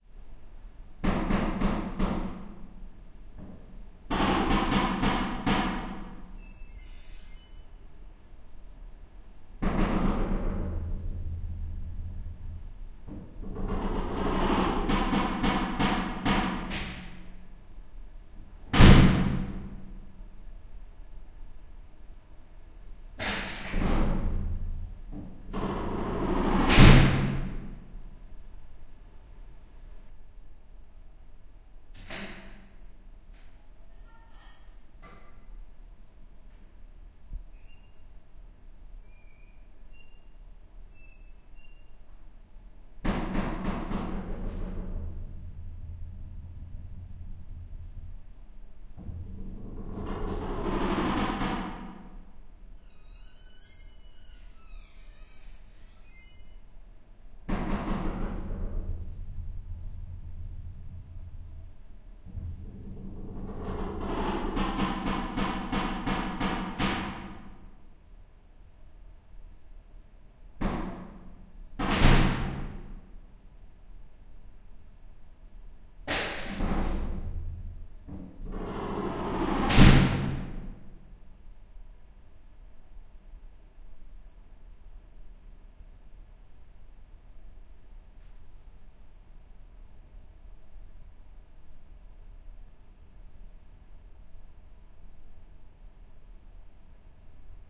Distant Steel Door Open Close Shut Creak Ambience
A steel door, 8 meters down the stairs in a silent concrete office building, being opened and falling shut, creaking.
metal, steel, hit, iron, office, corridor, clang, slam, metallic, concrete, fire, impact, creak, door